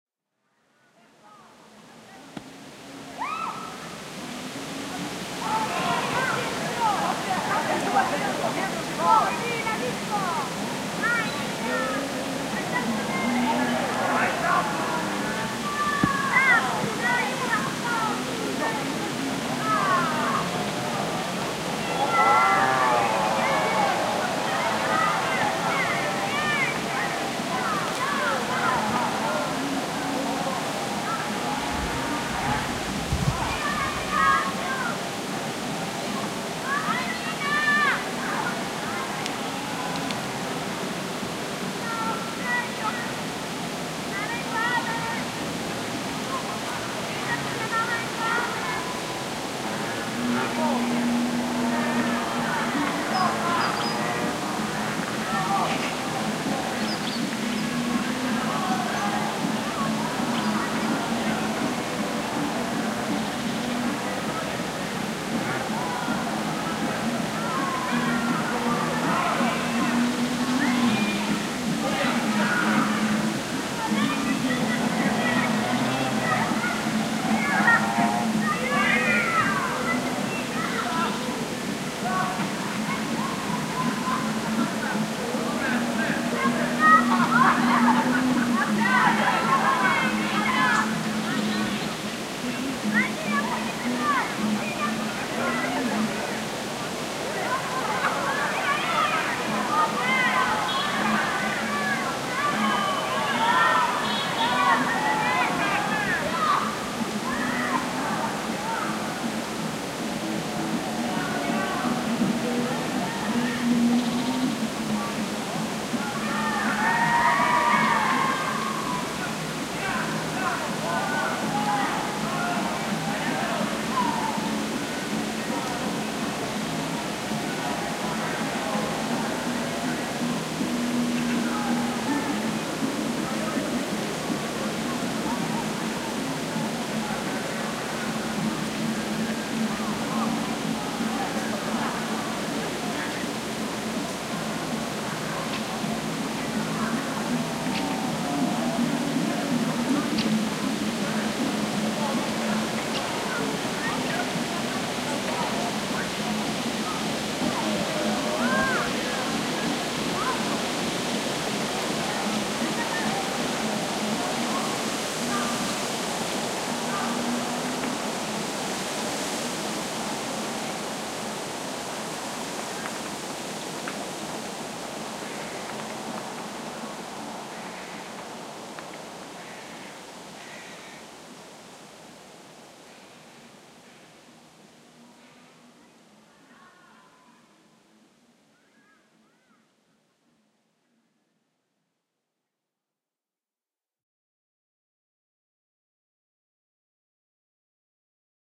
date: 2010, 07th Aug.
time: 05:00 PM
place: WWF lake reserve (Piana degli Albanesi - Palermo, Italy)
description: The sound is a record of some distant voices of young people who eat, drink and make races with the bikes on the shores of beautiful Lake of Piana degli Albanesi. Nearby, on the recorder, the branches of trees moving with the wind.
Palermo, Sicilia, field-recording, lake, nature, rural, soundscape